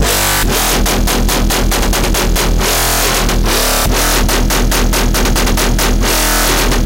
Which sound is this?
Heavy Dubstep Wobble Bass
140BPM. Dubstep wobble bass created from three layered custom wobble synths which were made in the 'NI Massive' plugin.
If you use this in a song, I request that you link the song in the comments. I like seeing how the things I make are used. :D
loop, wow, edm, bass, loops, 140bpm, roaring, dubstep, wobble, heavy, key-of-E